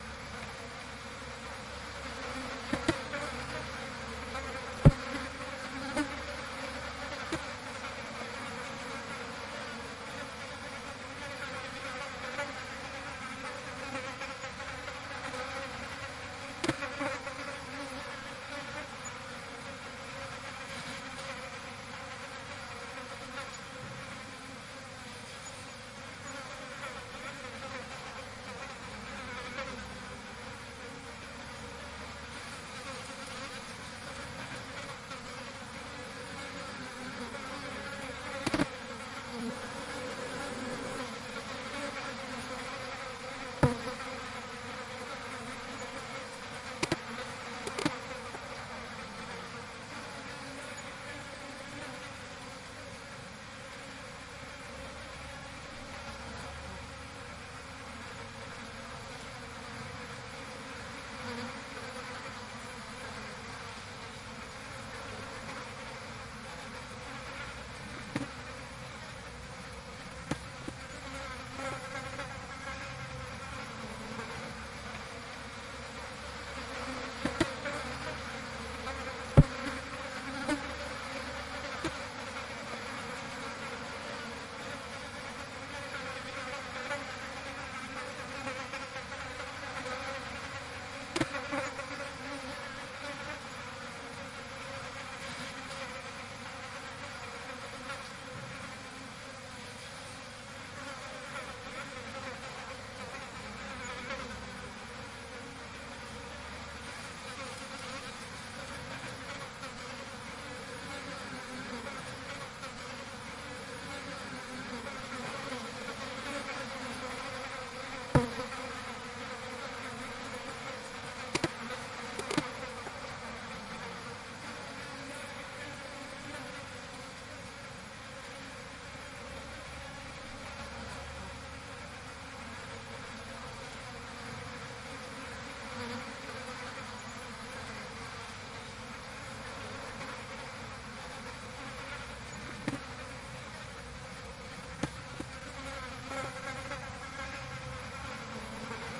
Flies on shit 3
flies shit bees insects nature summer field-recording Omalo
bees, flies, insects, nature, Omalo, shit, summer